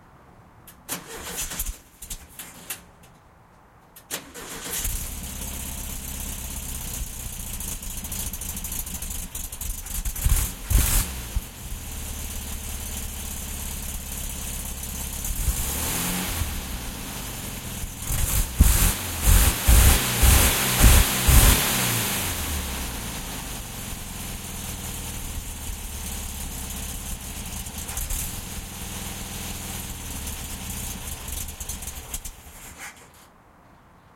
1970 VW Bug Engine Starting Sputtering
Using a Zoom H2n to record the sound of my 1970 VW Beetle as I started it.